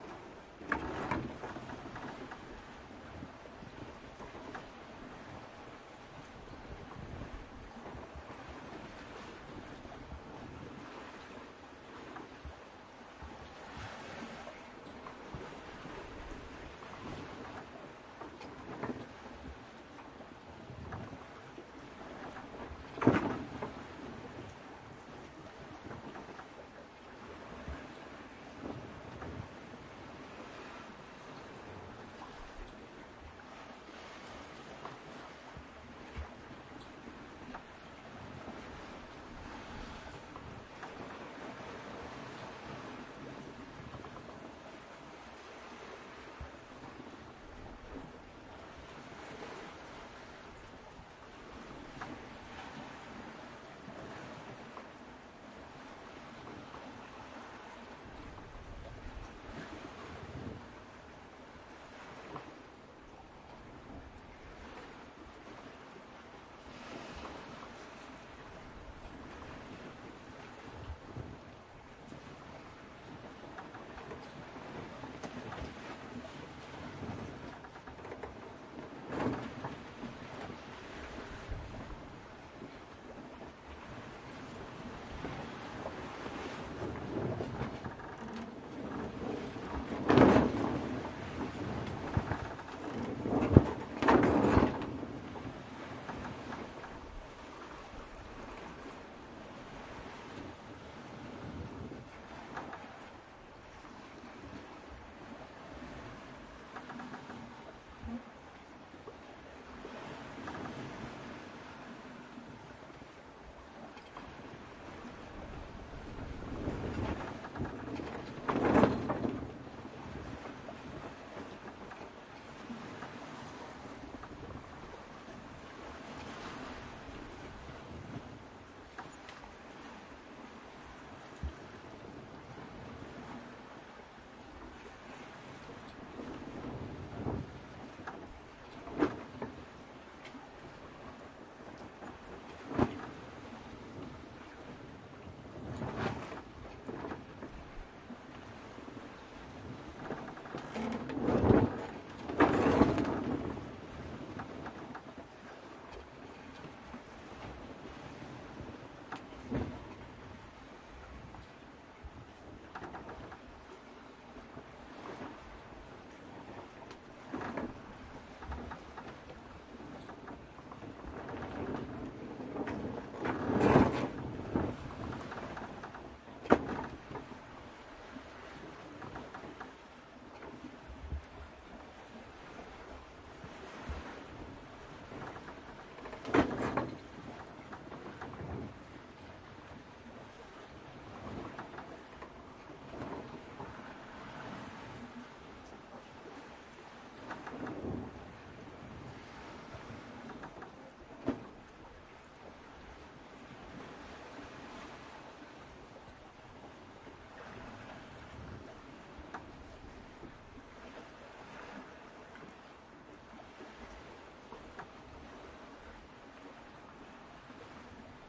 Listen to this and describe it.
Sailboat, cockpit at 12kn wind speed
Sailing from Spain to Canary islands in October with a 12 meter yacht. Wind speed was around 12 knots. I positioned the recorder in the cockpit. Recorded with an Olympus LS-12 and a Rycote wind shield.